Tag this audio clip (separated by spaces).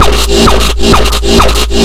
hardcore noise distortion techno beats distorted kick-drum rhythmic-noise kickdrum drum-loops noisy hard loops